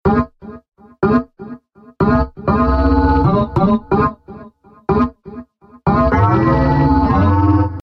No Glue-Included
bouncy, space